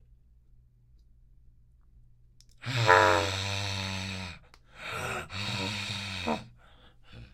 26-angry fox
angry fox sound
angry, anger, fox